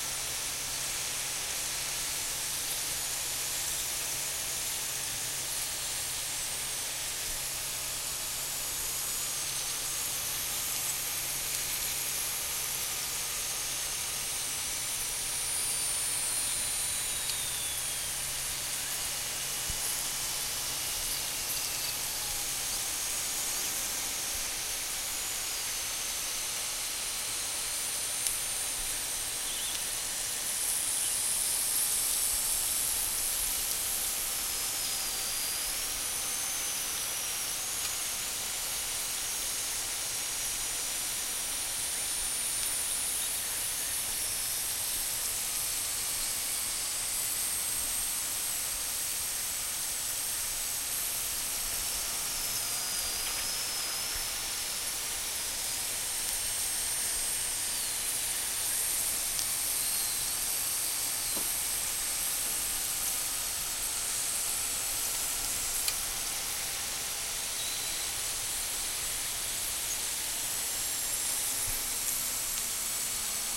potatoes frying in pan
potato slices frying in pan with minimal oil over gas range.
binaural condenser mic with minidisc used for sound harvest.
frying, pop